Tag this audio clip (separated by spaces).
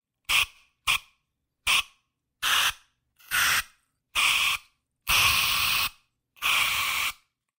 aero air burst spray spraycan